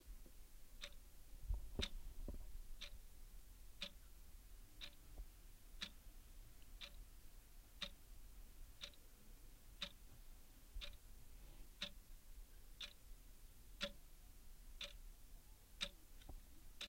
Ticking clock, 10 seconds